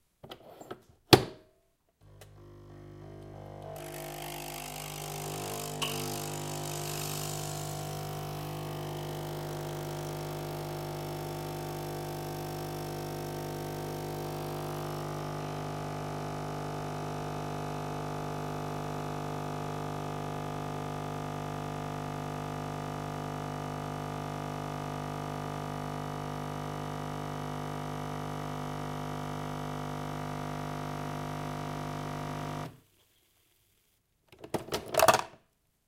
Nespresso coffee maker machine, Krups Essenza Mini XN1108.21 model, Recorded with a Zoom H2n.
coffeemachine, coffeemaker, coffee-maker, nespresso, coffee-machine